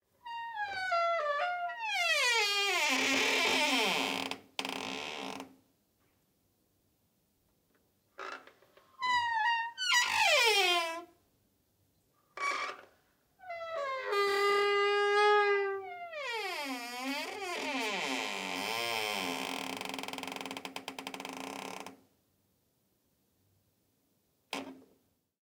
Creaking wooden door 03
Recorded in my bathroom, the wood door is pretty light. Opening.
with h5+sgh6
bright, close, creak, creaking, door, light, old, open, squeak, squeaking, squeaky, wood, wooden